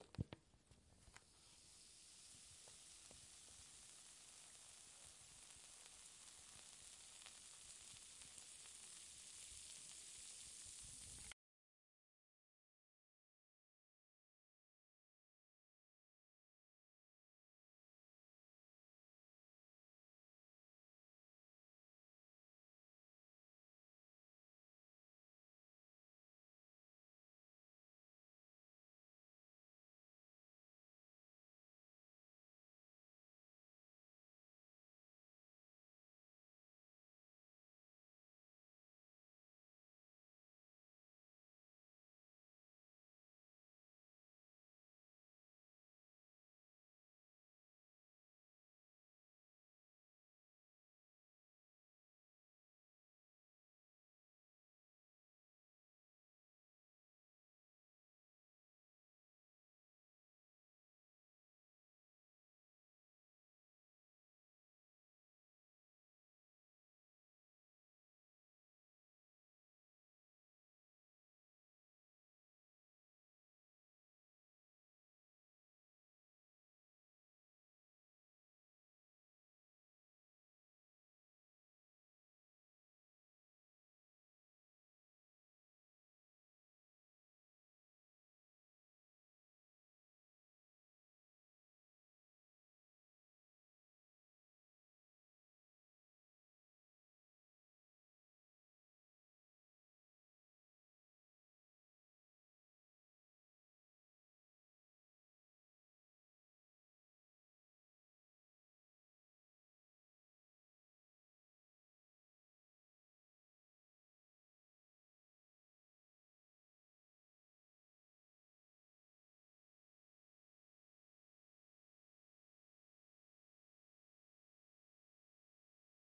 The soft sound of baking soda mixed with water to sound like soft cracking of fire, recorded in a sink on a Tascam D-40
fire, flames, burn, flame